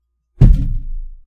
Wall Bump 1
A single bump sound from me accidentally hitting the wall while recording videos. I liked the sound of it so I uploaded it here. It was recorded with a Blue Yeti Microphone using Audacity.
hit, dry, surface, wood, wall, hollow, thump, thud, knock, bump, low-pitch, impact, low-rumble